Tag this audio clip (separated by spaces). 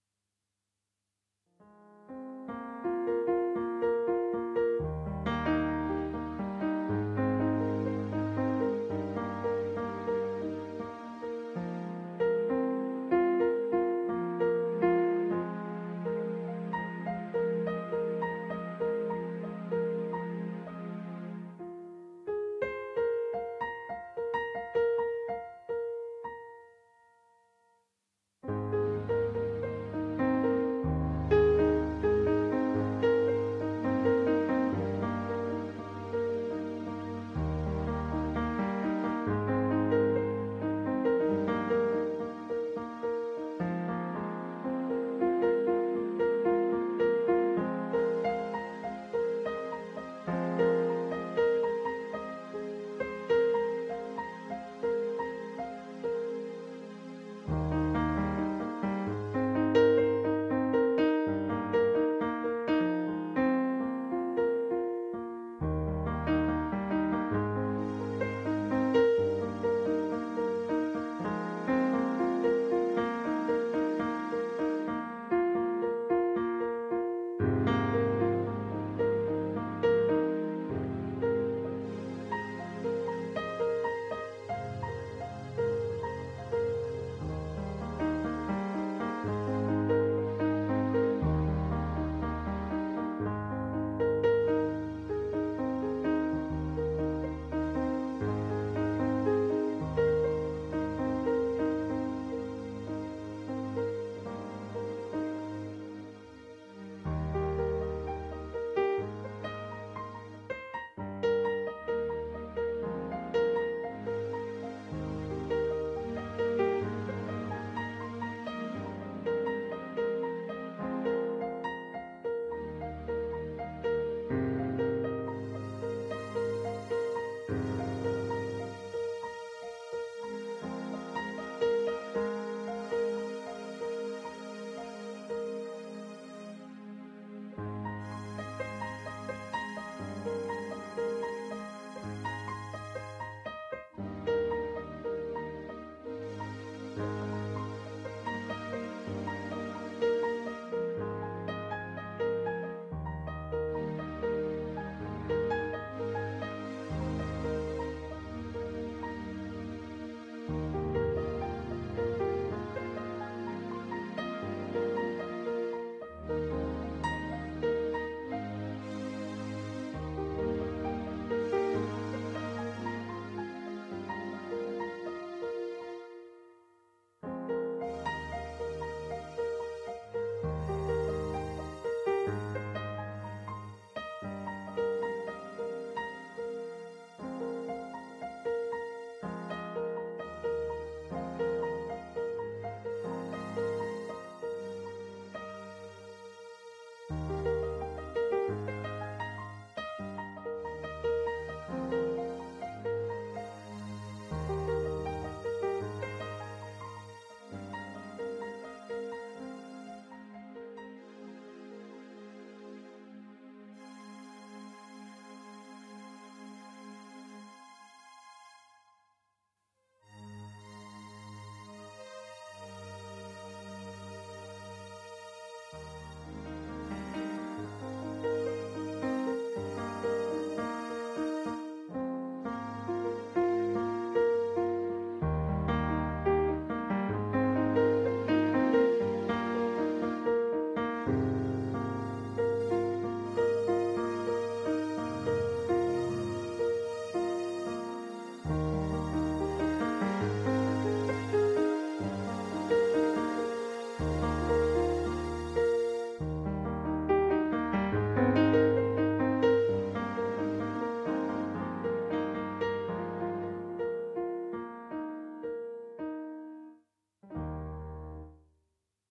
Uplifting Hopeful Keys Piano Happy Joy Smile Repeating